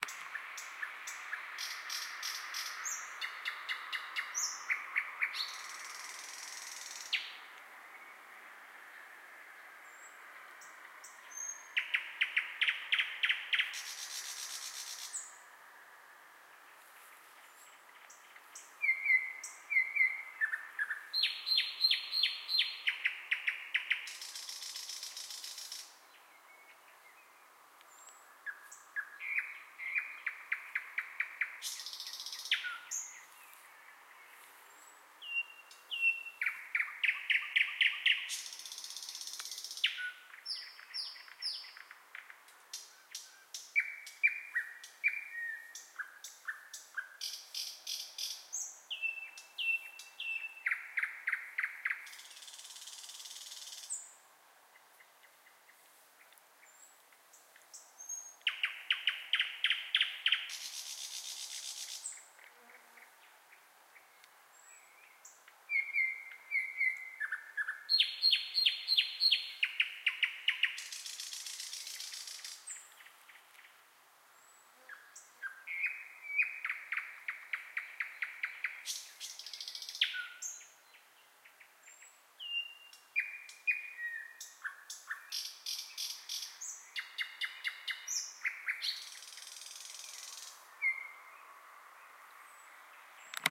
Exotic sounding bird recorded very close with good sound quality
A bird, probably nightingale or some thrush, but I am not an ornithologist to say for sure. Thanks, God bless :)